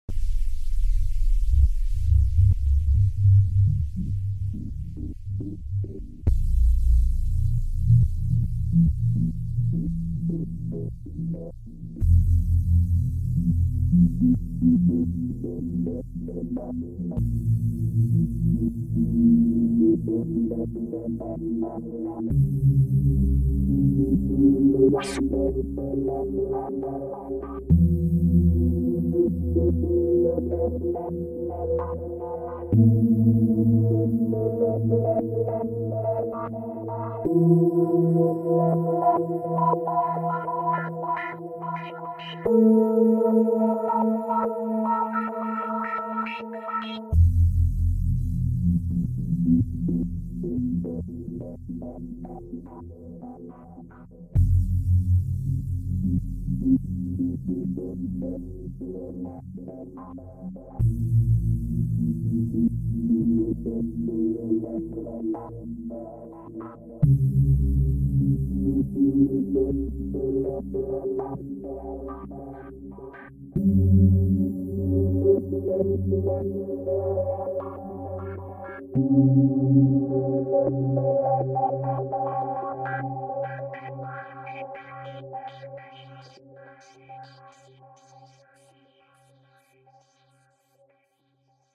Sci-fi Low Weird
A sci-fi processing sound with a delay and weird digital sounds. Created with a synthesizer.
abstract, creepy, data, digital, evil, freaky, processing, scary, sci-fi, scifi, sound, space, strange, stranger, synth, things, weird